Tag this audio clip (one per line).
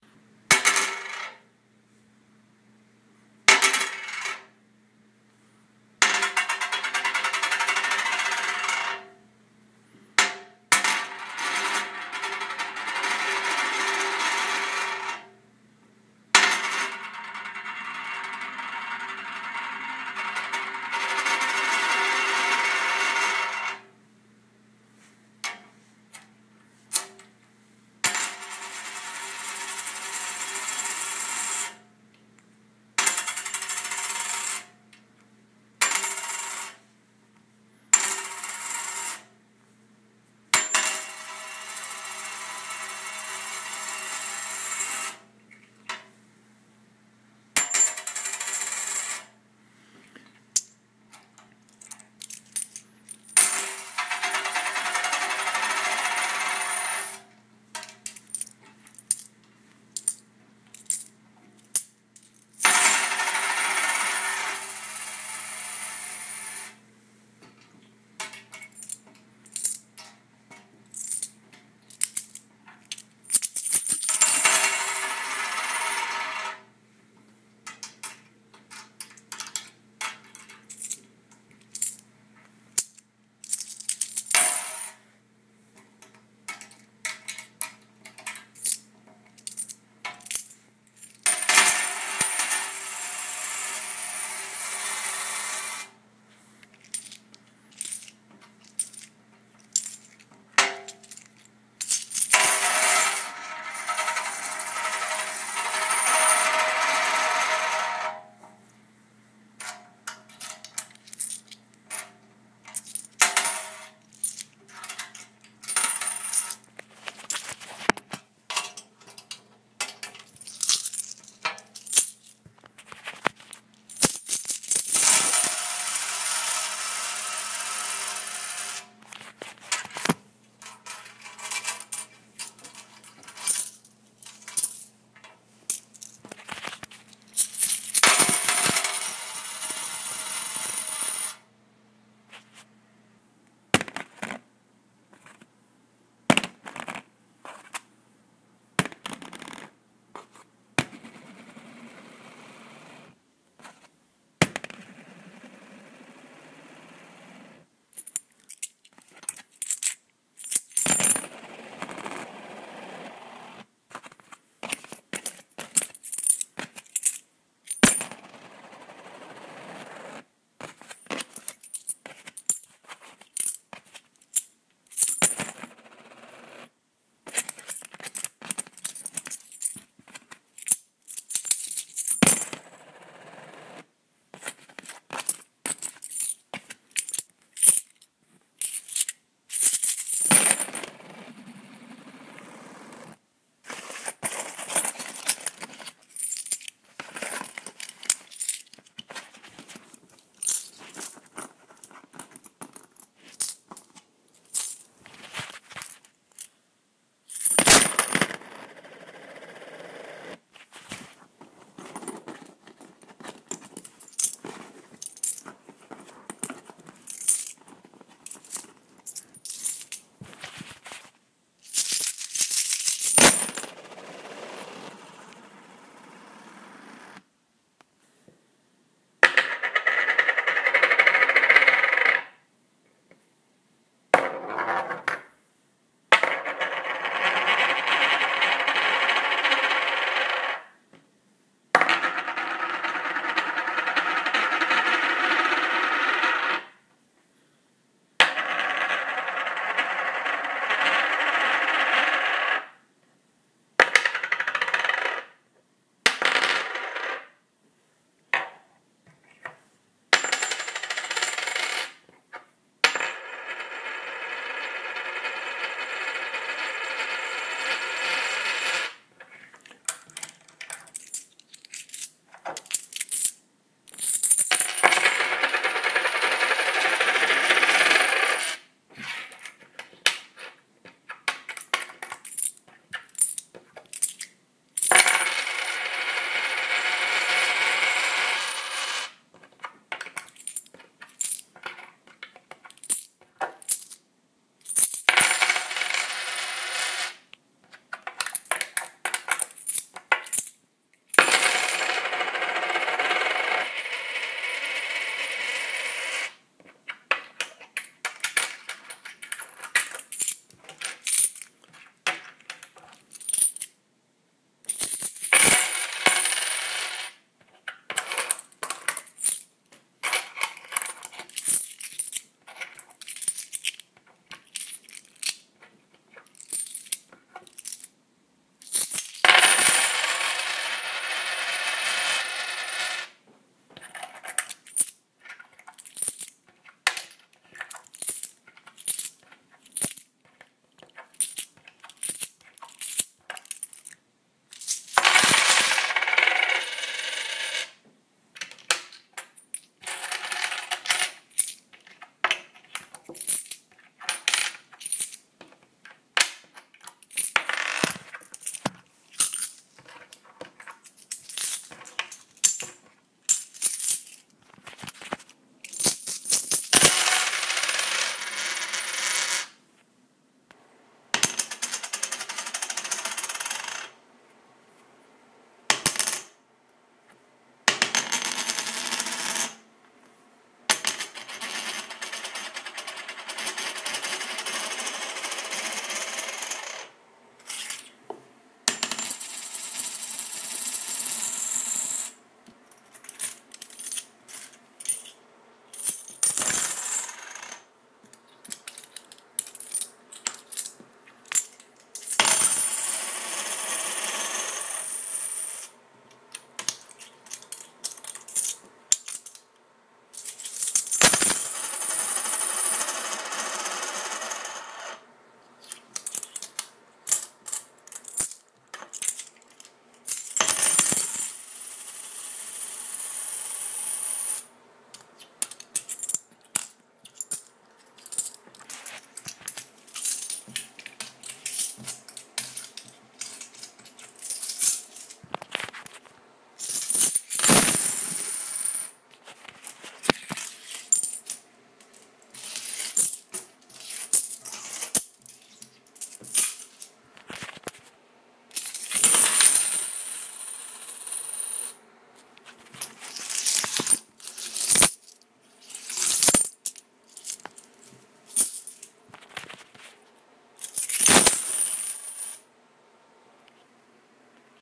throw
rpg
game
coin-toss
dice
roll
rolling
die
throwing